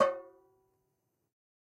Metal Timbale right open 014
god, conga, real, open, trash, home, record